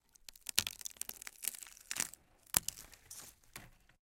rip of a rotten bough, close up, H6
We found a big tree with some small dead boughs and recorded this sound.
Zoom H6 / 41 khz / 16bit
I used the XYH-6 Mic.
bough, crack, crackle, dry, high, small, snap, wood